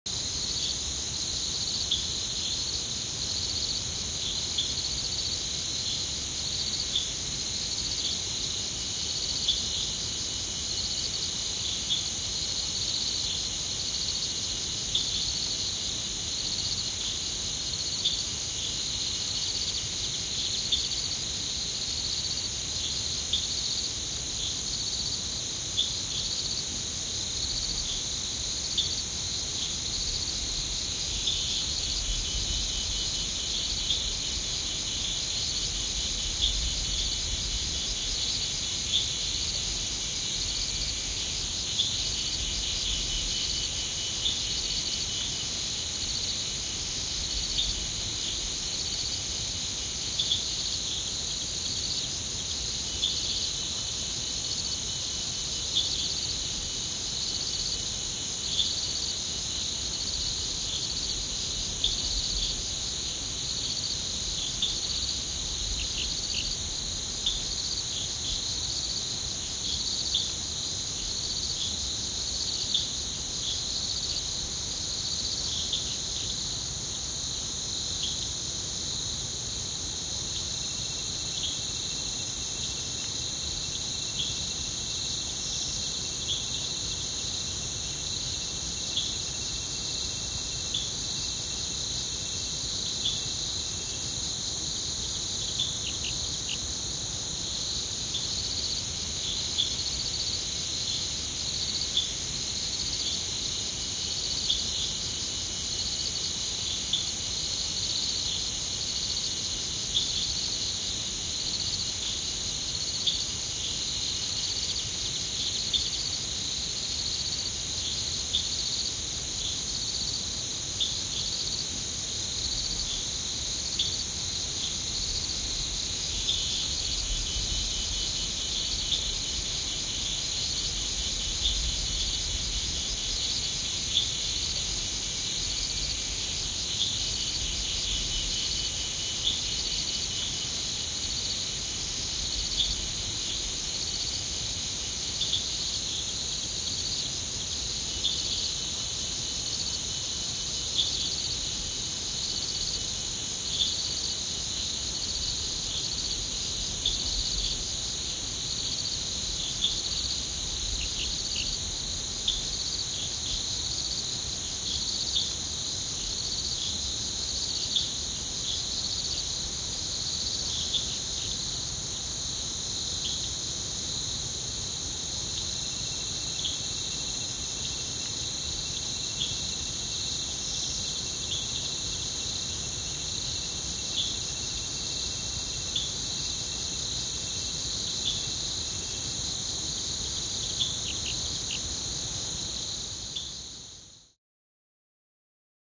Nan Doi Phu Kha Night 01
Recorded at Doi Phu Kha National Park, Nan province, Thailand at 7-8 pm, night-time insects and natural ambiance.
With Sony PCM D100 + built-in Mics.
Ambiance, Ambiant, Asia, Background, Countryside, Crickets, Field-Recording, Jungle, Mountain, Nature, South-East, Thailand, Tropical